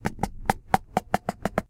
Finger slipping over plastic lid 2
Rubbing finger across the lid of a plastic container.